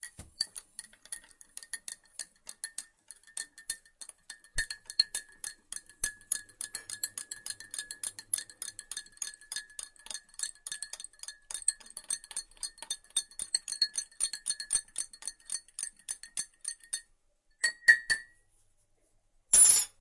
Egyptain Tea Stirring